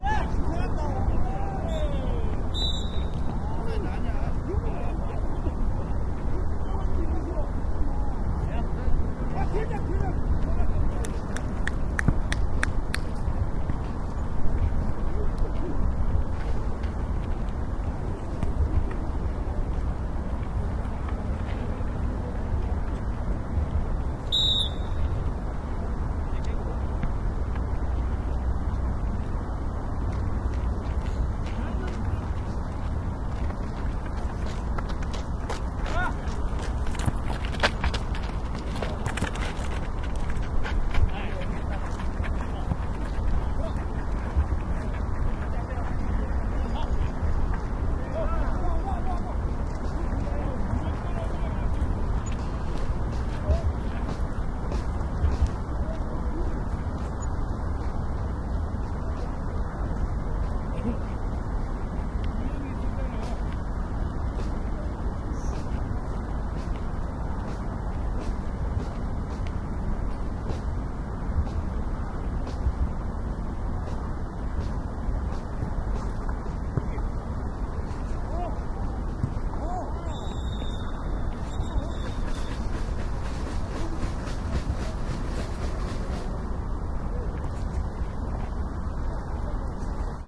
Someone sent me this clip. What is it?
0147 Football match amateur
Football match amateur. Traffic in the background.
20120129
field-recording, korea, korean, seoul, voice, whistle